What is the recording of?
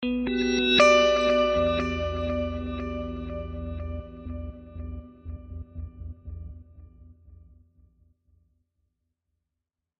Emin9th chord tweaked in lo
an Emin9th Guitar chords with partial reversal and the tail end strip silenced in time
guitar, chord, ambient, reverse